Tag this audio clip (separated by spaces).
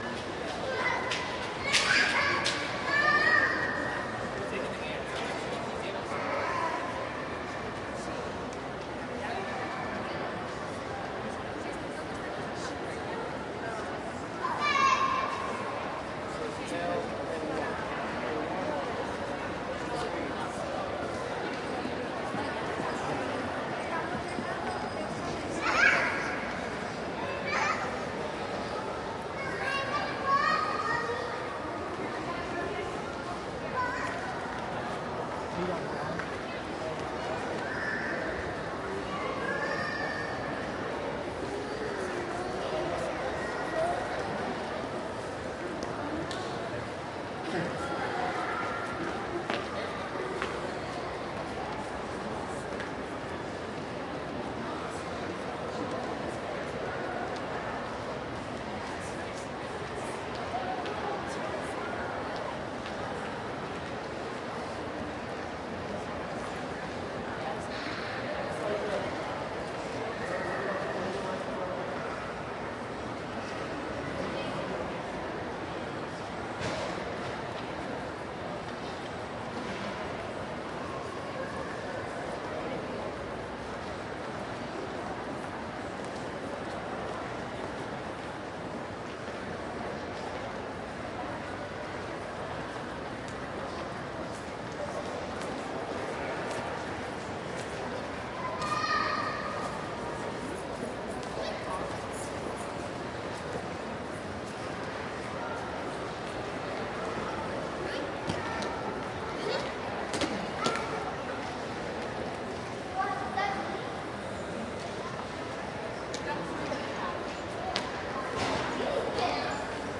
corridor dallas field-recording mall northpark texas tx west